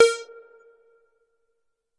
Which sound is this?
MOOG LEAD A#
moog minitaur lead roland space echo
moog, minitaur, echo, lead, roland, space